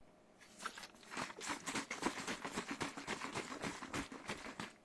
Shaking Liquid
Can be used for anything that needs a sound of liquid being shaken, i.e. Shaking a carton of orange juice.
bottle, can, carton, juice, liquid, shaking, water